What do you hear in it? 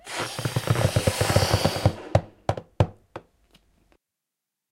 Balloon inflating while straining it. Recorded with Zoom H4